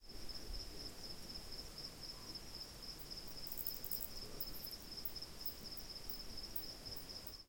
Insecte-amb nuit(st)
Sound of insect during a night in the bush in Tanzania recorded on DAT (Tascam DAP-1) with a Sennheiser ME66 by G de Courtivron.
night, africa